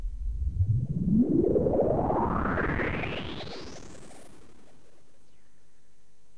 Time space transfer - sound two - made with clavia nordlead 2 and recorded wwith fostex fv16